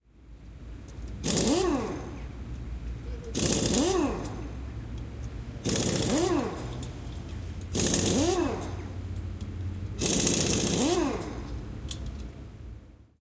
Impact wrench working in the open air :)
Recorded witch Lenovo p2 smartphone.